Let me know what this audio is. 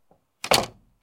Closing Door slam
lock, fechando, close, door, porta, shut, slam, closing, doors